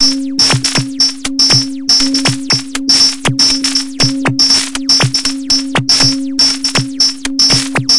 Aerobic Loop -37
A four bar four on the floor electronic drumloop at 120 BPM created with the Aerobic ensemble within Reaktor 5 from Native Instruments. Weird electro loop. Normalised and mastered using several plugins within Cubase SX.
drumloop, loop, rhythmic, 120bpm, electronic, electro